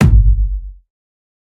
CRDN PNDLRGBT KICK - Marker #28
heavily pounding bassdrum originally made from 10 litre bottle punching sounds recorded with my fake Shure c608 mic and heavily processed by adding some modulations, distortions, layering some attack and setting bass part (under 200 Hz) to mono.
will be nice choice to produce hip-hop drums, or experimental techno also for making cinematic thunder-like booms